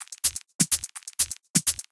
loop i have sequenced with the addiction of some glitches and hiss sound
beat, drum, loop